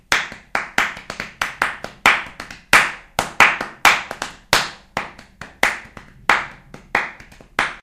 hands.clapping.bright.pattern
pattern of hands clapping, tense palms /patron de palmadas